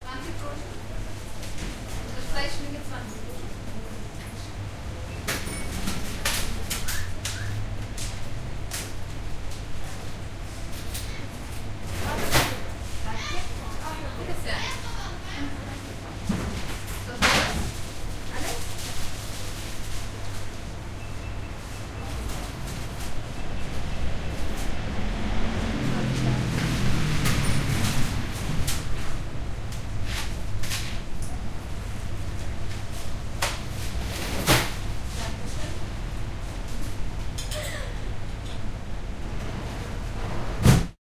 Bakery Shop (1) in Vienna, Austria
Inside a bakery shop in Vienna, Austria. Voices, Ambience. XY recording with Tascam DAT 1998, Vienna, Austria
Shop, Ambience, People, Atmosphere, Bakery, Voices, Austria, Vienna, XY-Stereo